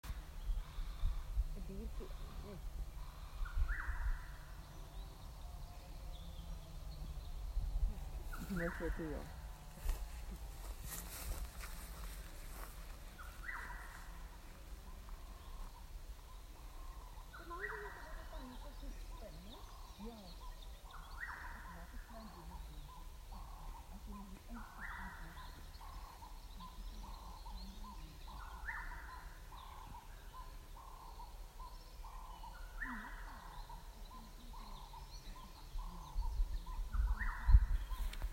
Pine forest birds in Maclear, Eastern Cape
Some birds in a pine forest in Maclear in South Africa's Eastern Cape, with a little human ambiance.
Eastern-Cape, Maclear, pine-forest, South-Africa-birds